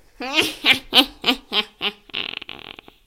Evil Laugh
A evil is laughing. A Sound that I recorded.
Scream, joker, Horror, Scary, evil, man, laughing, laugh